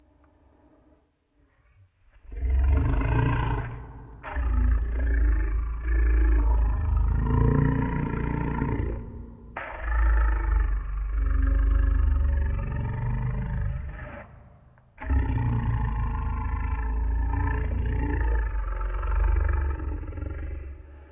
Playing around with voices.